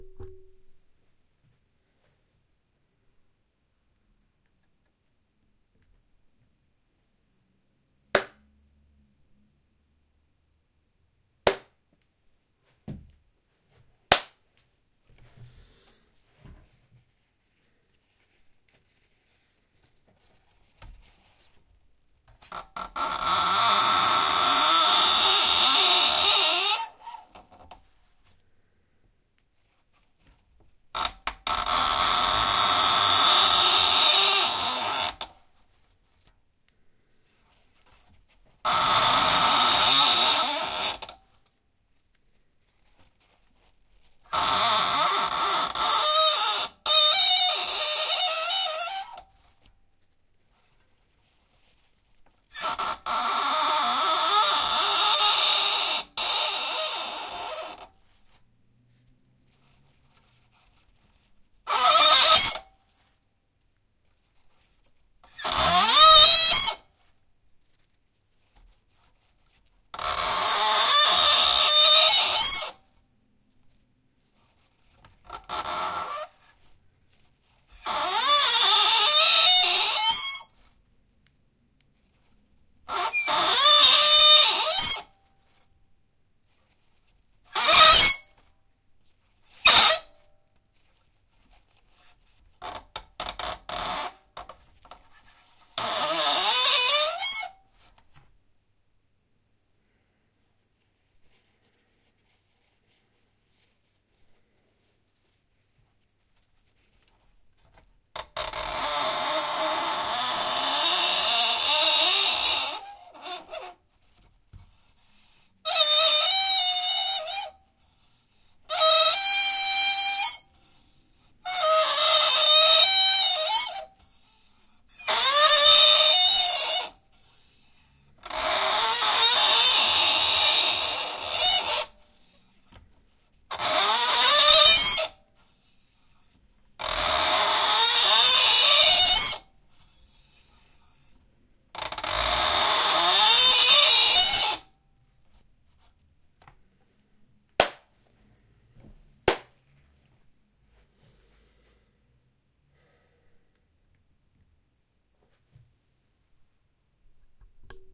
Cleaning HP Printer Index strip annoying trumpet sound
Sounds made by cleaning HP printer index strip.